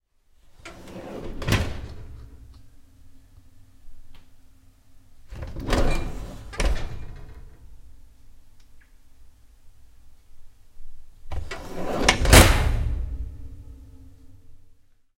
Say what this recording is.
Gas Oven Door open & close
Gas-oven door opening and closing